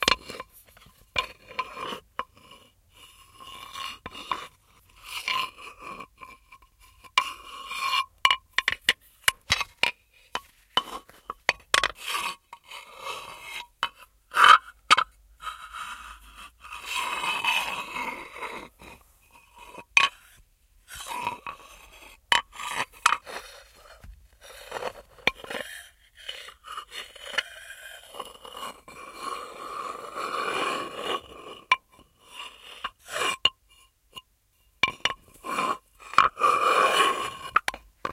the sounds of two small marble cutting boards interacting
cutting, stone